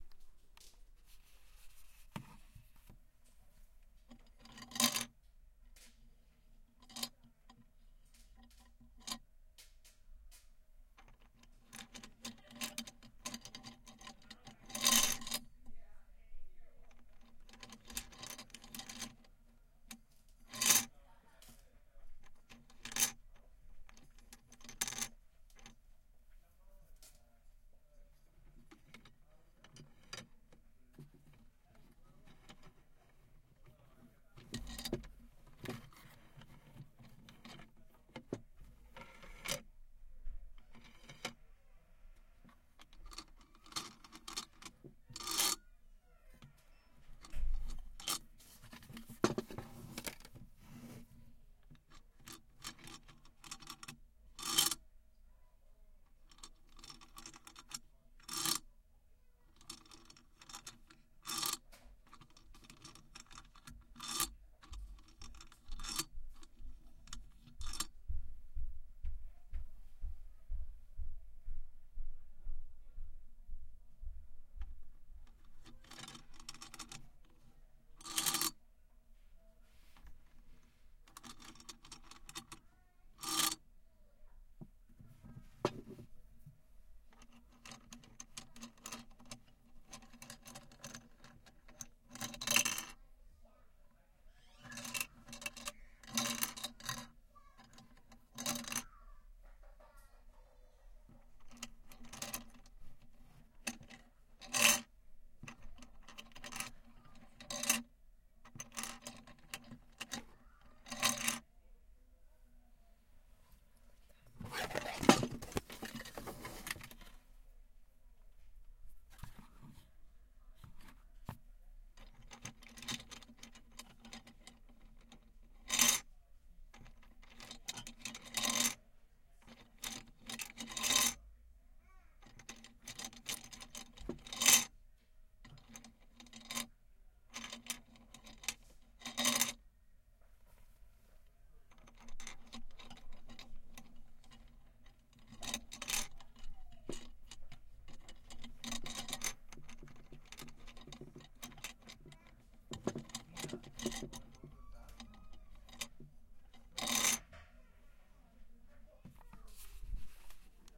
letting wooden beads fall down metal bars (a baby toy)
one in a series of recordings taken at a toy store in palo alto.
clinking, falling, metal, toy, toy-store, tumbling, wooden-beads